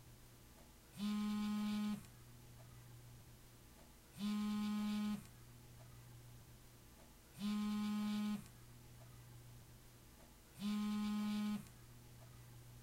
Phone vibrate
A cell phone vibrating (as in pocket)
hum, call, phone, cell, vibrate